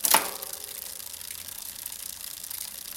bike pedal loop
bicycle, pedal